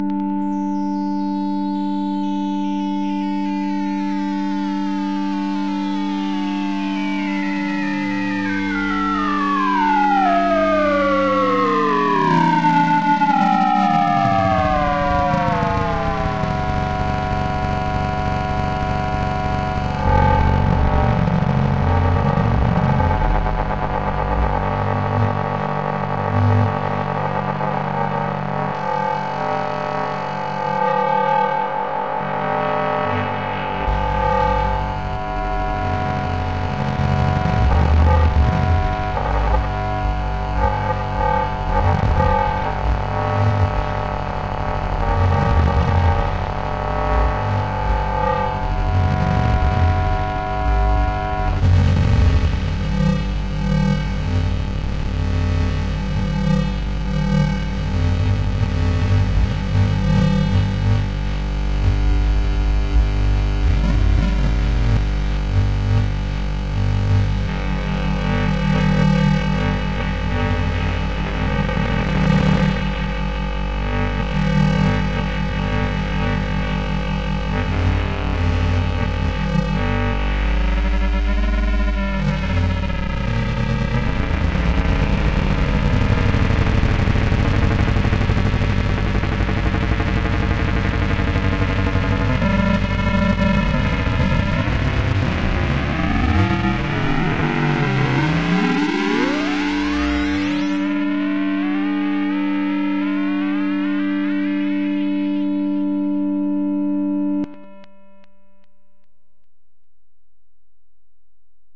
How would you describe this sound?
Digital Headspin
Digital, Fuzz